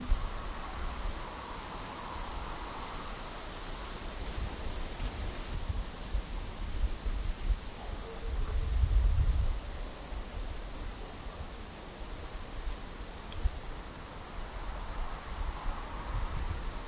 Ambient Wind
Standing near a big tree, listening to the wind.
The wind is shaking the leafs in the tree, so this sound would fit in forest or woods scen.